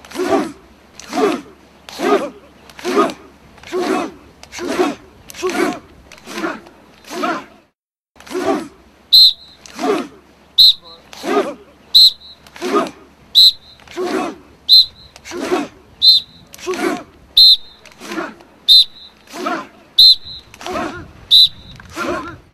Martial Arts U.S. Army Training
Soldiers training hand to hand combat. First a version without whistles, then the original version with the trainer's whistle.